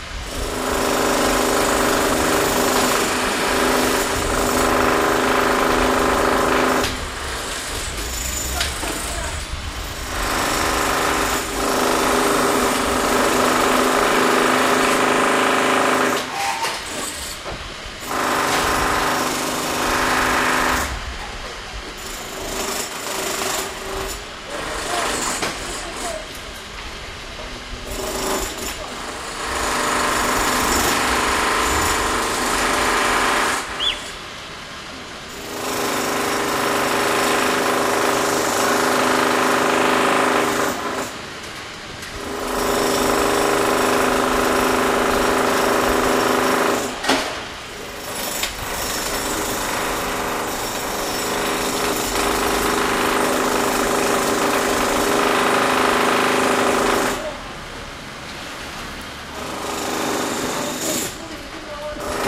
Construction Site / Byggarbetsplats
fiel-recording, constructing, workers, building, worker, outdoor, Gothenburg, electrictooling, high-noice, builders, drilling, construction, house, drill, build, work